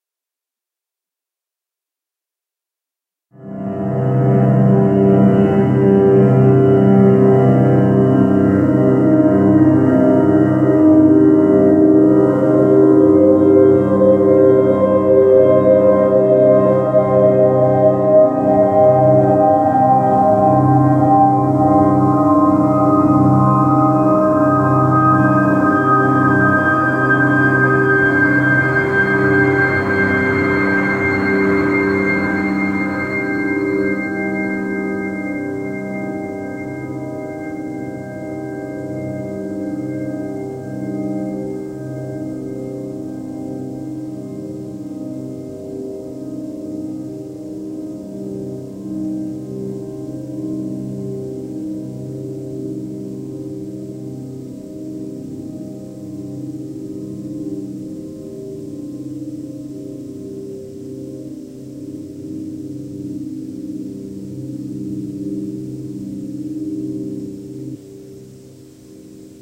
Builds quickly, sustains and gradually fades. C Minor arpeggio stretched from 11 seconds to 1 minute using the Paulstretch effect on Audacity. Low-fi recording with tablet and Hi-Q app.
dramatic, eerie, Gothic, haunted, Piano, spooky, sustained-piano, timbre